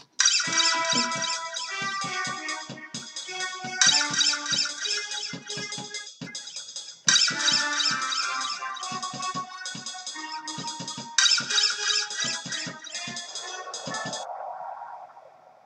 This was created with sampled percussion from the keyboard in Adobe Audition CS6 and recorded via Logitech webcam mic. The sound was further de-noised and cleaned in Audition's spectral view and effects applied to make the arrangement work.
Hopefully this will prove useful to some.